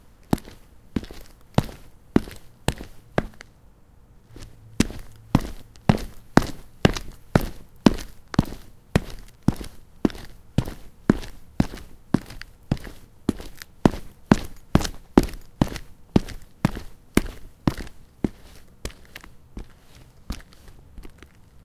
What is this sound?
boot steps on concrete foley
foley recording of me stepping on a flat concrete surfaces at various paces.